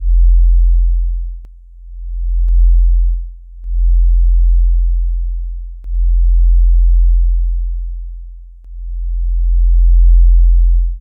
A subbass sine wave with a slow throb volume envelope and some panning action, designed to work well as a loop or a sample. Created with ableton and audacity.